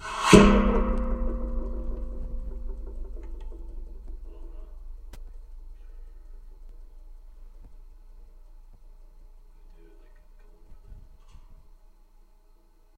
contact mic on satellite dish06
Contact mic on a satellite dish. Scraping my finger across the dish, then plucking the edge of it.